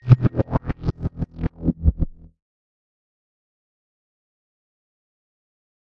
wompy bass
A modulated bass guitar sound.